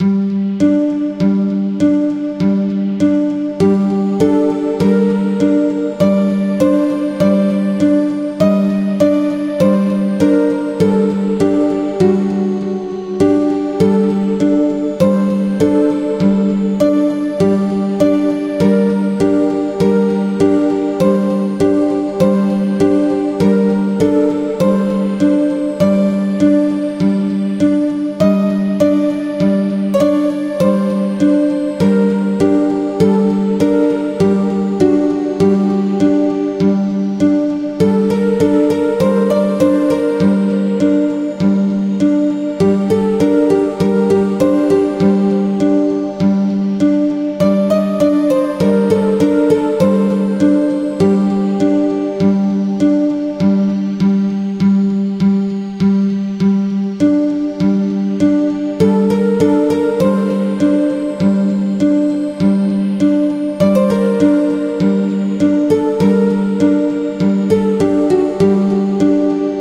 Pretty Synth Melody 100bpm C minor
Melody made in FL Studio at 100 bpm C minor.